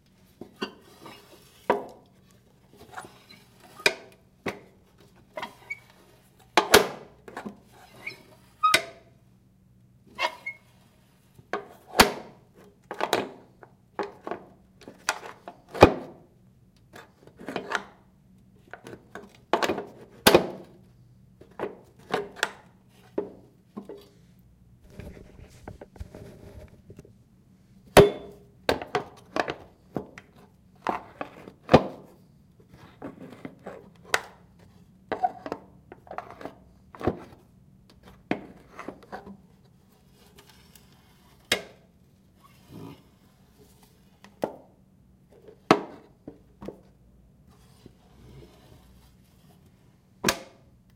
Metal and Wood Movements
This is a wooden bank with metal parts on it that I moved around.